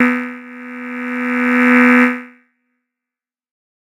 This is one of a multisapled pack.
The samples are every semitone for 2 octaves.
noise, pad, swell, tech